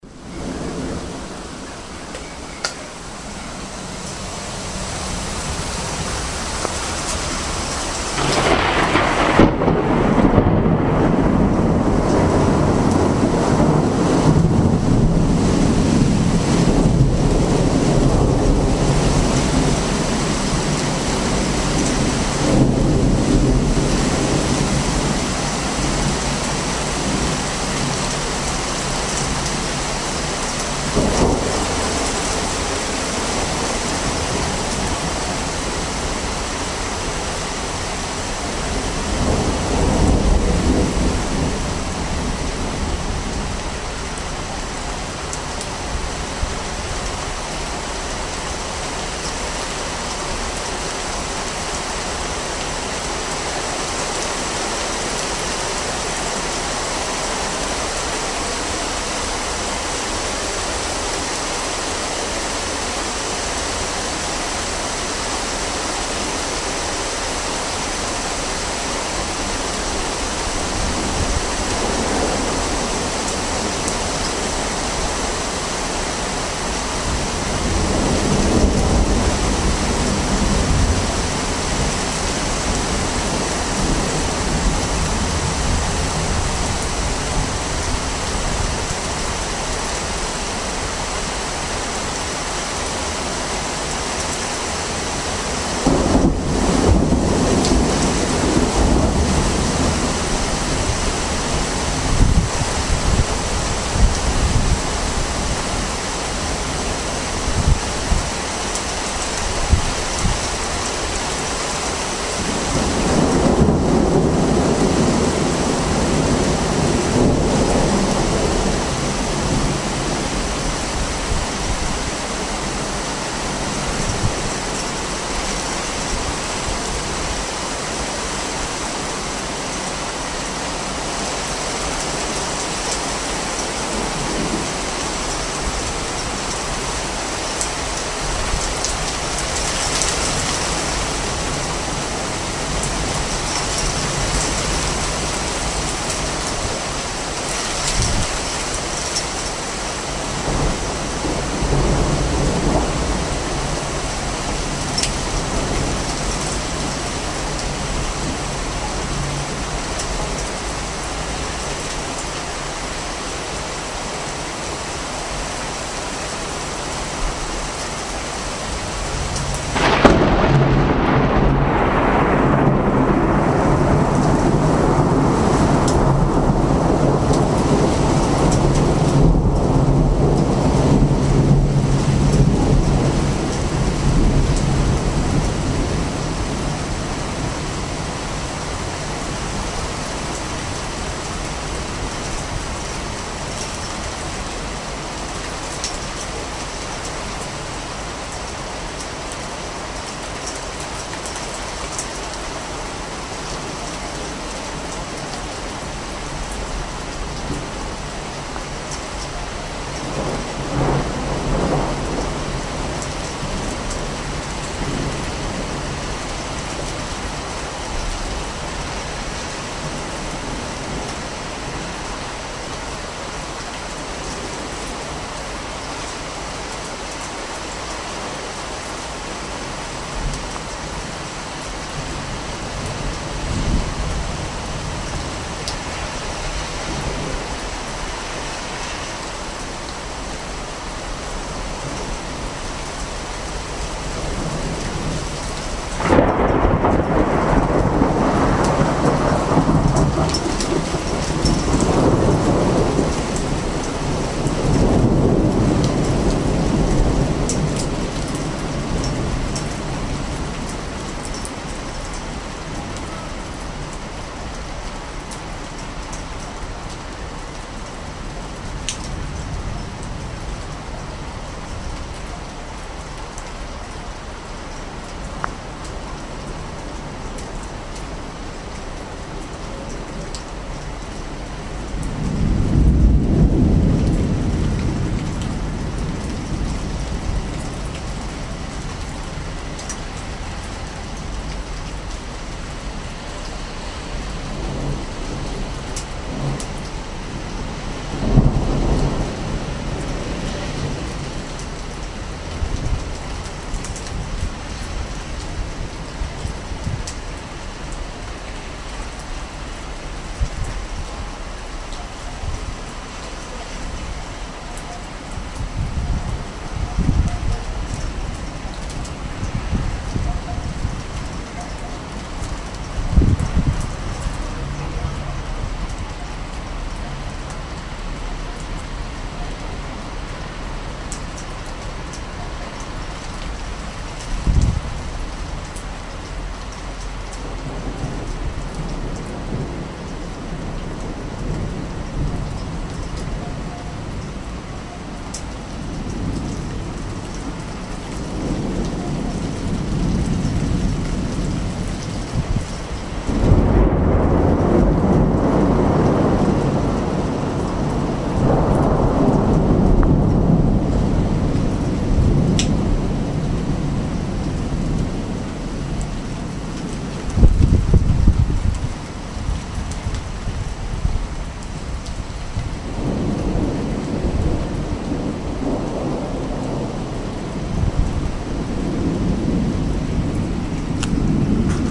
Storm Lighting flood thunderclap
I made this record in this year in ours balcony of ours flat (Hungary- Budapest, 05/03/2013), at 20 hour.